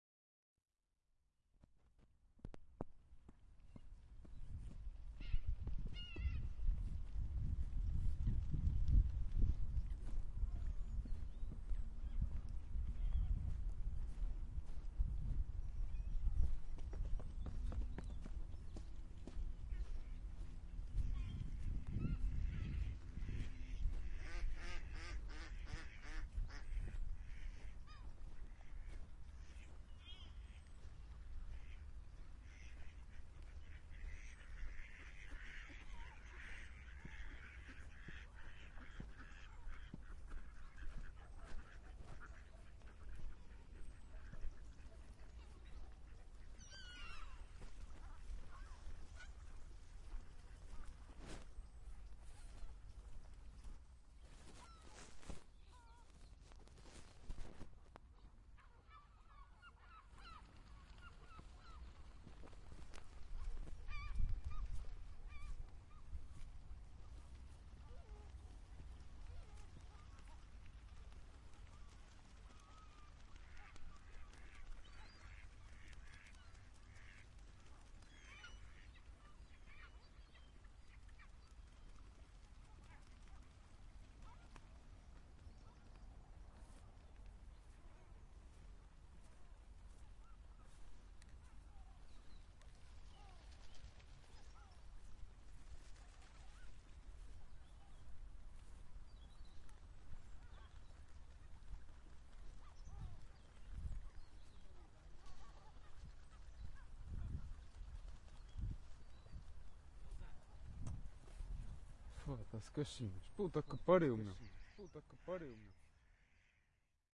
Approaching the lake
Approaching Porto's Parque da Cidade lake.
boavista, cam, cidade, da, ducks, lake, parque, porto, ulp, ulp-cam